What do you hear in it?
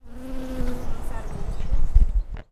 abelles carol sergio
una abeja en el parque de la solidaritat al prat de llobregat